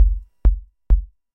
Modular J3rk dual mirro core vco kick bd
Modular, mirro, kick, vco, core, dual, bd, J3rk